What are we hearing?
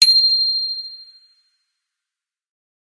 bicycle-bell 14
Just a sample pack of 3-4 different high-pitch bicycle bells being rung.
bell, bicycle, clang, metal, percussion